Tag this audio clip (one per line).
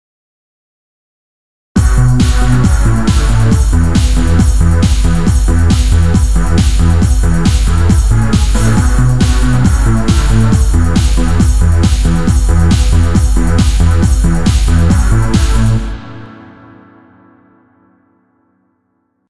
full
loop
loops
song